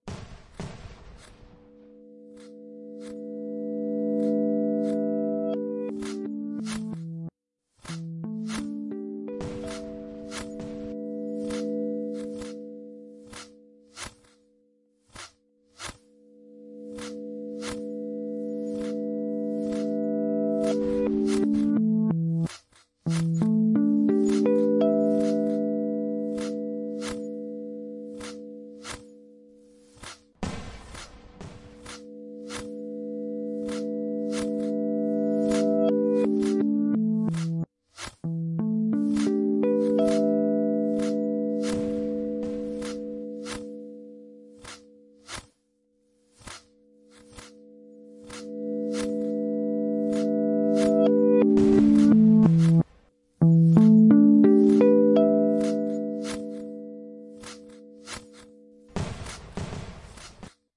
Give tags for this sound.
lovleyish; noisy

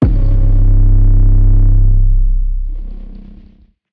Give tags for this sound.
808; one-shot

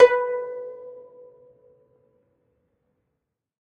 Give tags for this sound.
kayageum; acoustic; zither; zheng; kayagum; pluck; string; flickr; koto; guzheng

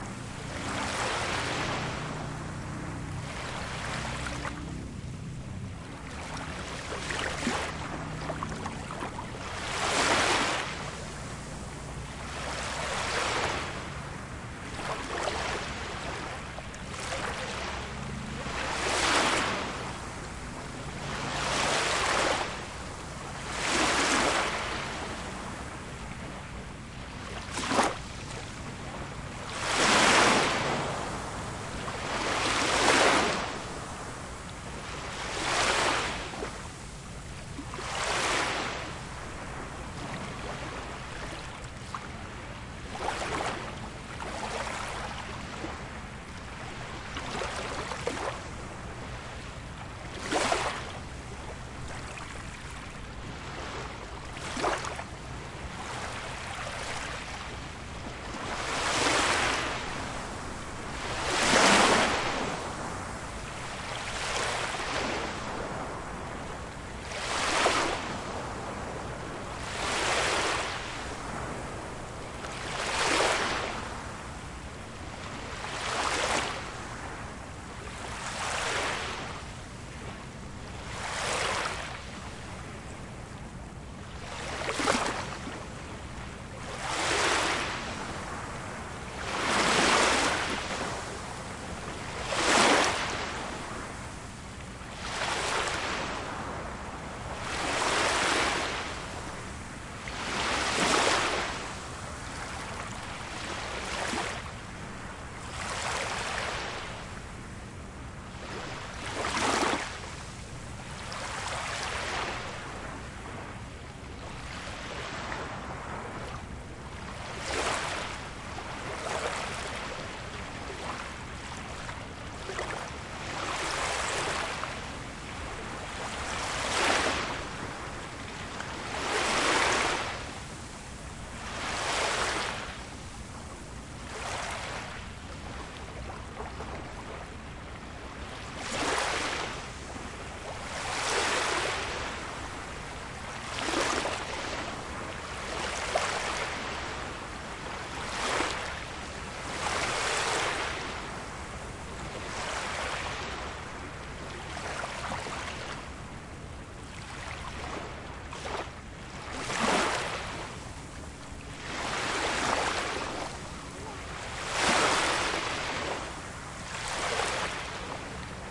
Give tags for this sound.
beach; stereo; waves; field-recording; small; gentle

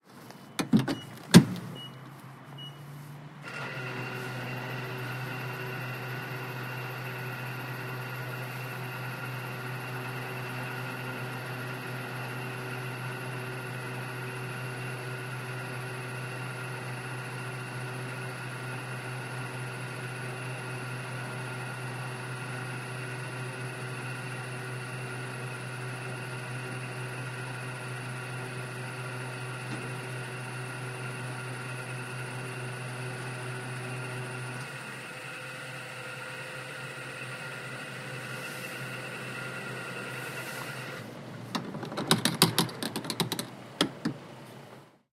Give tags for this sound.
ambience; car; field-recording; industrial; gas; fuel

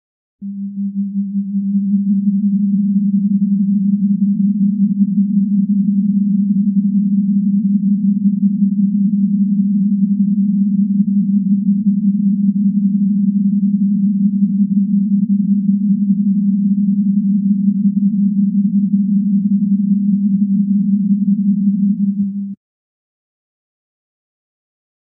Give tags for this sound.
Glow; Question; Wonder

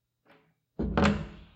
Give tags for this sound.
close; wooden

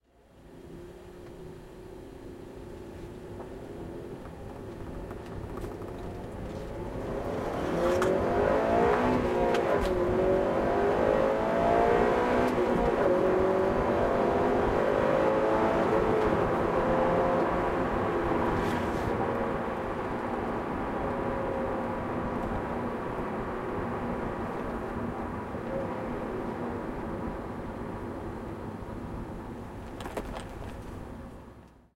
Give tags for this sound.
accelerating; car; engine; field-recording; sound

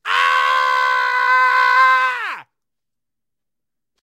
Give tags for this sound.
male; scream

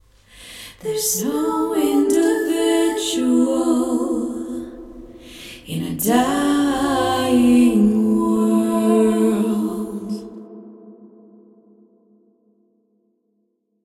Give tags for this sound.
woman; female-vocal; vocal; katarina-rose; 100bpm; life-drags-by; a-cappella

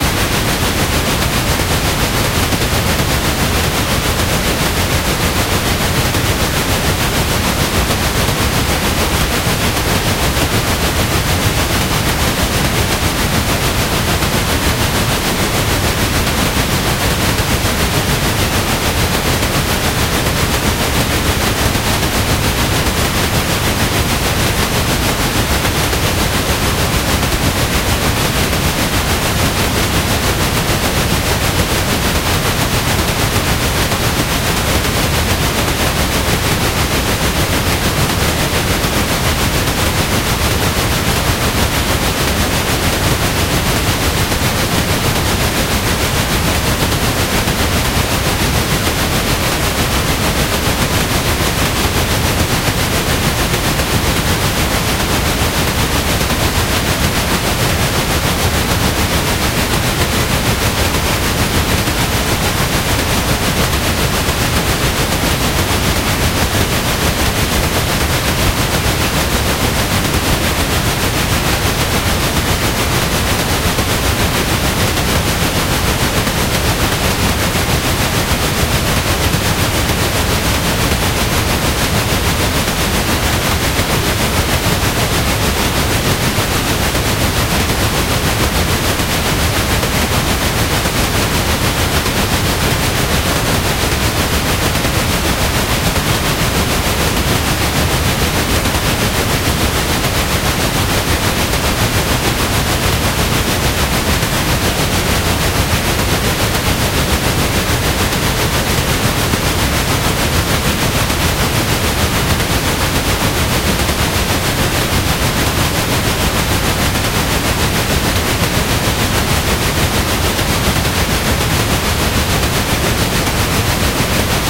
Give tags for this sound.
synthetic; locomotive; railroad; steam; train

